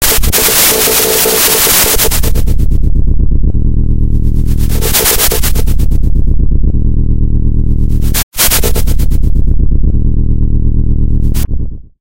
Genetic programming of sound synthesis building blocks in ScalaCollider
genetic-programming, scala-collider, synthetic